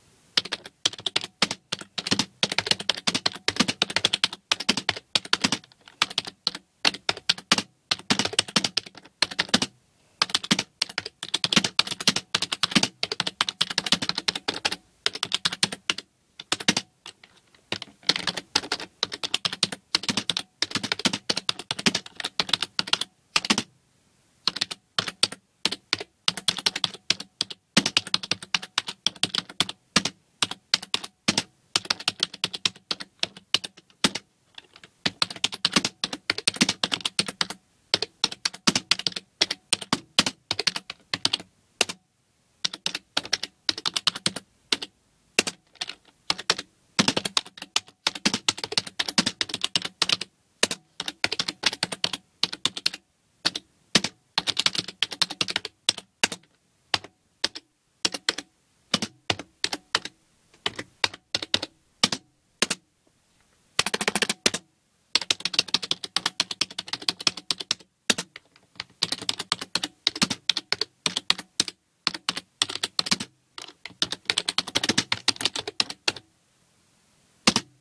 Typing on a Dell computer keyboard.
computer, dell, keyboard, keys, type, typing